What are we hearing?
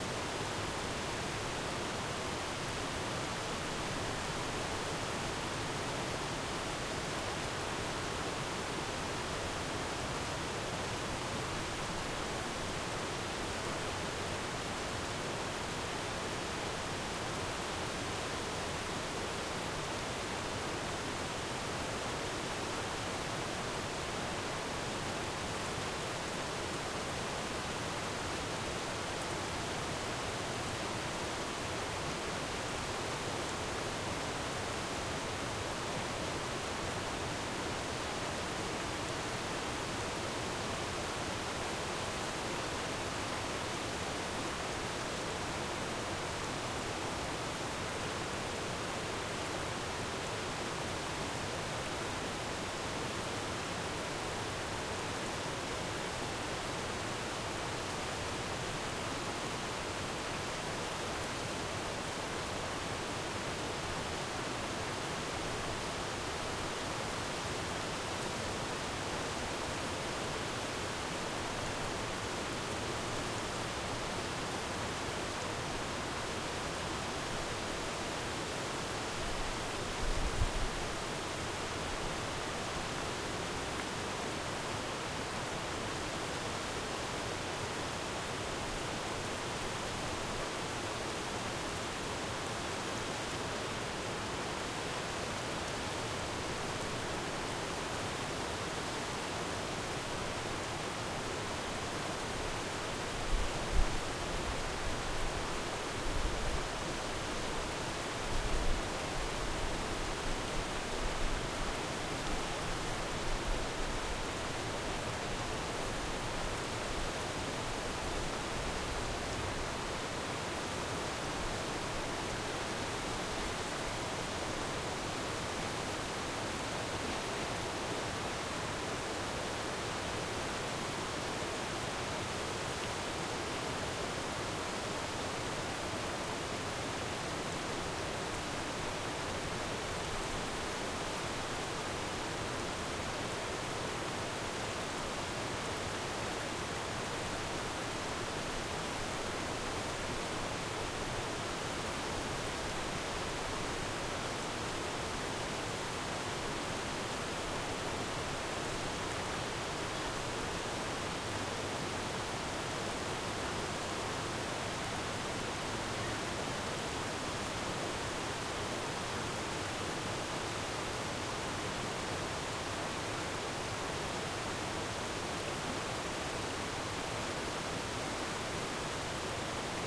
Waterfall / Dam / River in Suburbs
This is recorded at Droescher's Mill in Cranford NJ. The local dam used to power a waterwheel. The wheel is no longer in operation but it's still a nice relaxing spot. A little waterfall is in the foreground, a big waterfall (the dam) is in the background, and the sounds of traffic are audible faintly.
It can be played as a loop.
Zoom XYH-5 > Zoom F1 Field Recorder
ambient, creek, current, field-recording, loop, river, water, waterfall